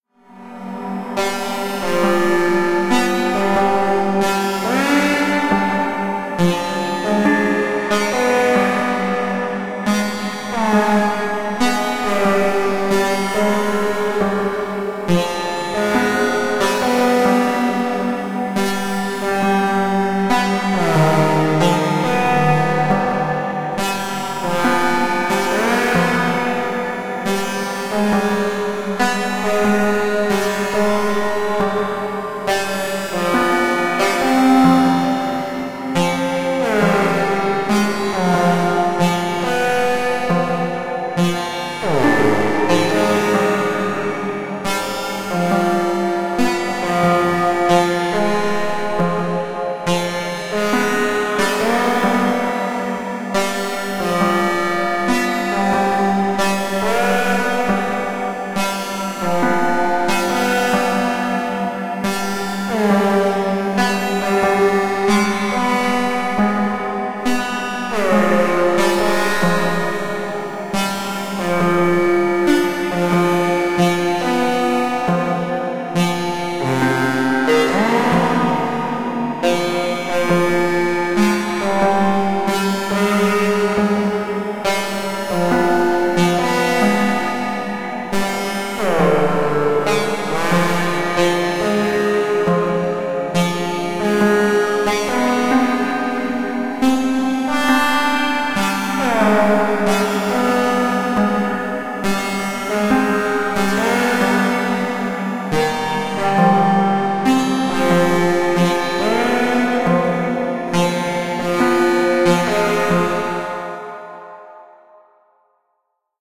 Random but somewhat musical output from an Analog Box circuit I created called "DroneQuartet", presumably because it drives 4 separate instruments that wander somewhat randomly from a central note in the same scale. Some of the played notes slide, most do not. It's just a strange effect, overall. I ended up trimming it and adjusting the amplitude somewhat in Cool Edit Pro, but the chorusing and reverb effects are all from the Analog Box circuit itself (except at the fade out, where I thought it best to add some just there, in CEP). This is not a loop, though I did look for a good place to splice it into a loop -- just never could find a good place (very long reverb makes it especially hard to find a seamless edit point). You might have better luck with that. But otherwise it's long enough that you can probably just excerpt a piece for whatever purpose you might find for this sort of thing.
abox, drone, music, plucked, string, synthetic